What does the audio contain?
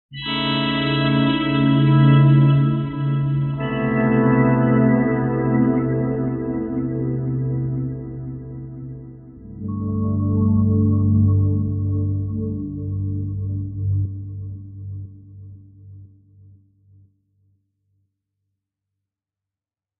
Some guitar chords with volume pedal and fx